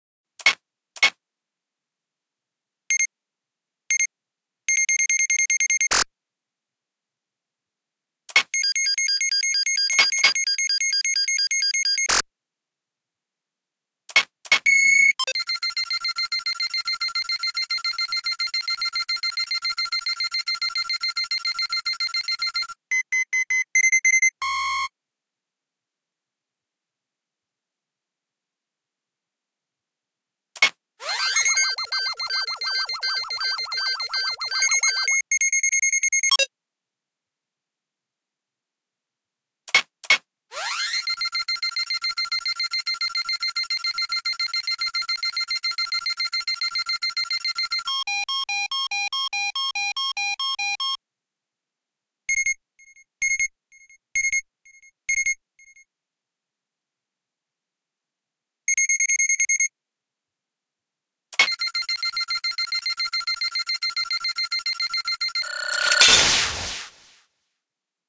Attempt to recreate from scratch several sounds of the Dragon Ball scouter (rastreador).
00.00- Pressing scouter Button (They also appear throughout the entire audio)
00.02- Scouter starting up
00.08- Setting the Scouter before scaning someone
00.13- First Scan
00.30- Second Scan
00.39- Third Scan
00.52- Scouter locating the origing of a Power source
00.58- The Scouter notify the user about an approaching power that it has just detected
01.01- Fourth scan + overdrive because of too much power level + it explodes